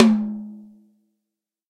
this is tom sample of an 14" tom which i was messing around tuning to give different brightnesses and sustains
recorded with an sm57 directly on it and edited in logic